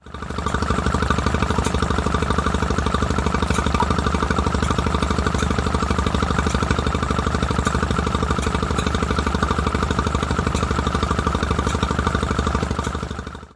Stationary Petrol-Gas Engine 1
Static engine recorded at vintage show
Gas, Factory, Industrial, Machine, Petrol